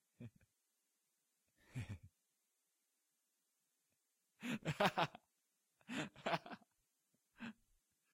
Discrete, hearty laugh
Crescent male laugh